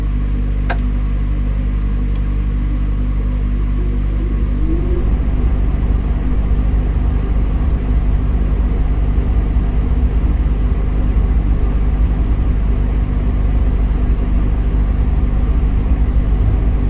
A recording made inside a refrigerator.
Recorded with a Zoom H1 Handy Recorder.
refrigerator, cold, drone, inside